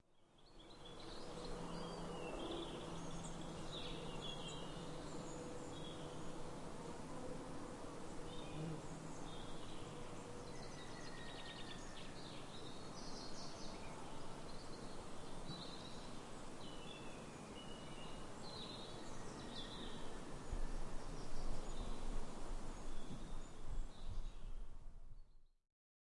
A spring day in late March 2008 at Skipwith Common, Yorkshire, England. The sound of the "yaffle" or Green Woodpecker is heard in the distance. There are also general woodland sounds including a breeze in the trees and distant traffic.